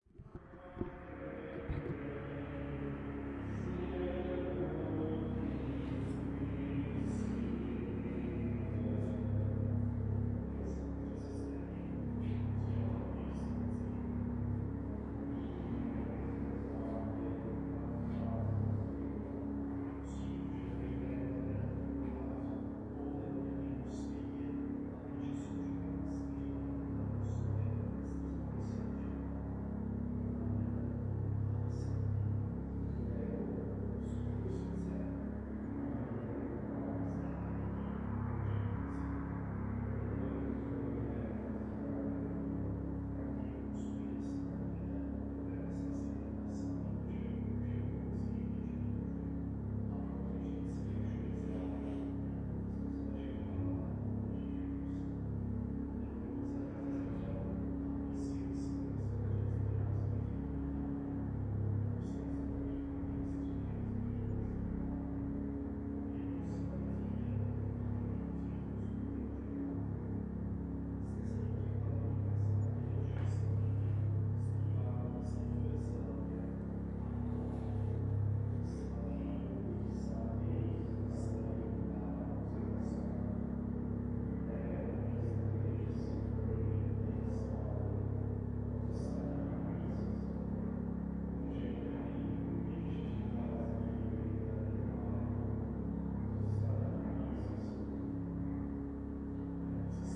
Exterior recording of a small rural church mass. Some engine noise in the background.
Recorded on /Zoom h2n